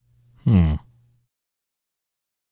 AS060822 Envy Jealousy
voice of user AS060822